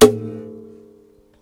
Brush hit on guitar